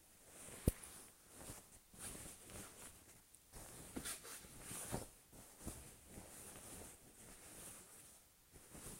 Bed Movement
this sounds is the sound of a movie blanket on a bed.
OWI, blanket, bed, movement